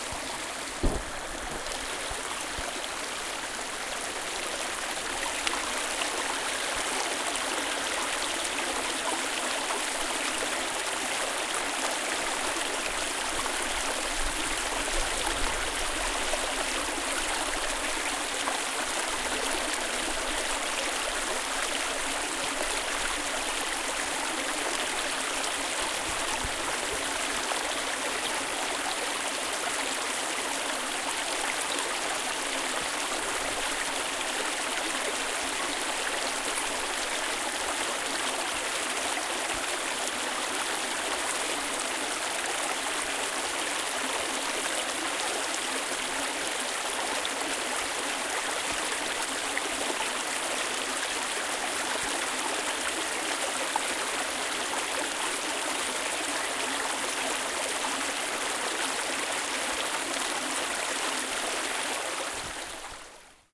wild creek in the woods
A wild creek deep in the woods in northern Bohemia (Czech republic). Recorded with Zoom H4N and normalized
creek, field-recording, forest, woods, ambience, brook, wild